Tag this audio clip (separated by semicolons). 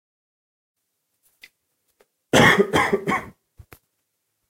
Cough; young-adult-cough; coughing